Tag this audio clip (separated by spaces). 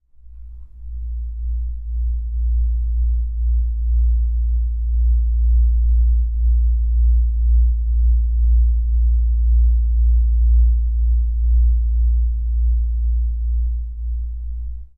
ominous deep pulse bass